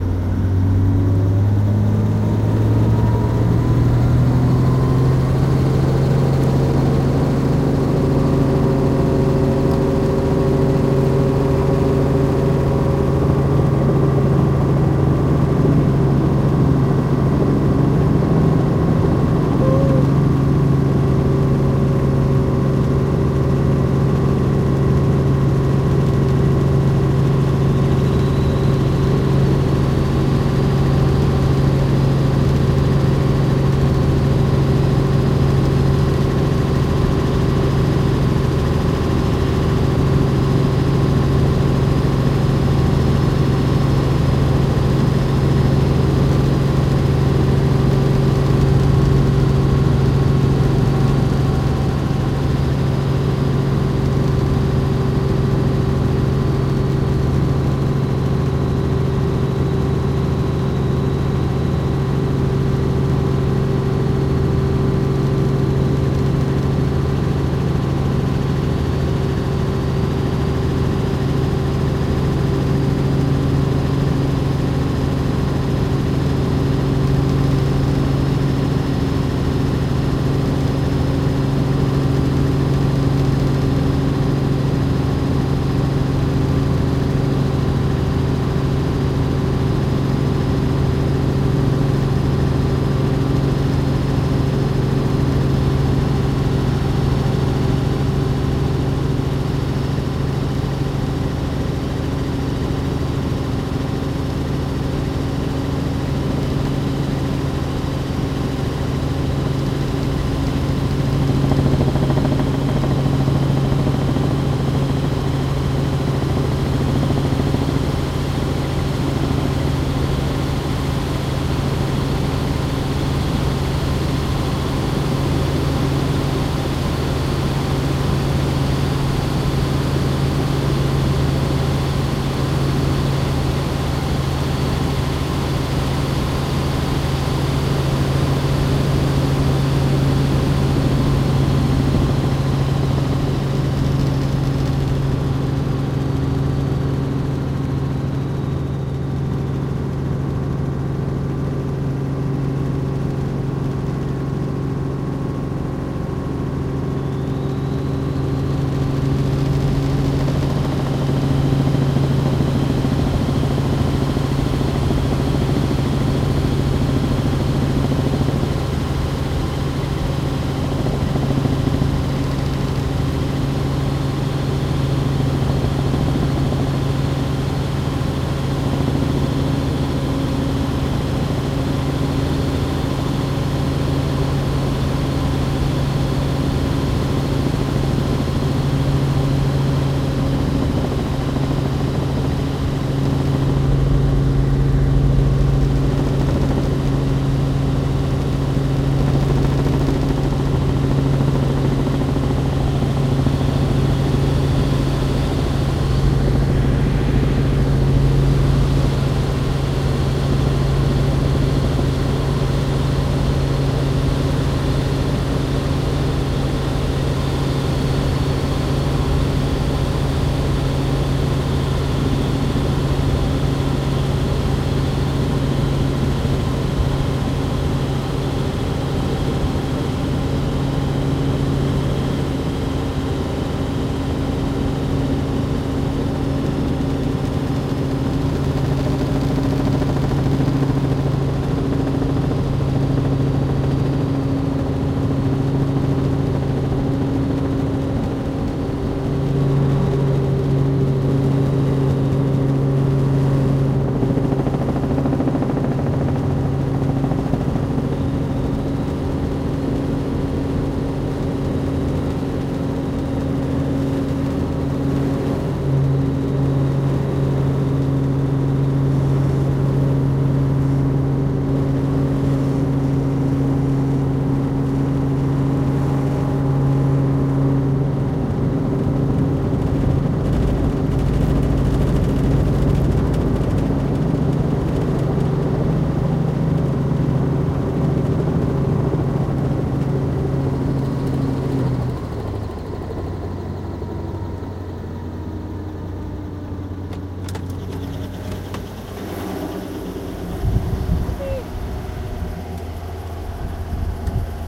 Flight in a helicopter. Unfortunatelly, I didn't recognized the exact type. Recorded with Zoom H1.
flight, helicopter, land, take-off